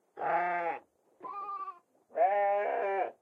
Lamb Chop Bandpass of 50 on 475
I used a bandpass filter of 50 bandwidth at 475 hz on Audacity to transform a goat "baw". The sound isn't too unusual, just a little more hollow than the original due to the very small spectrum I use. There are two goats.
remix, goat, aip09, farm, bah, bandpass, chop, muddy, hollow, berber, middle, lamb